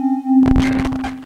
organ glitch shot
Casio CA110 circuit bent and fed into mic input on Mac. Trimmed with Audacity. No effects.
Hooter, Table, Bent, Circuit, Casio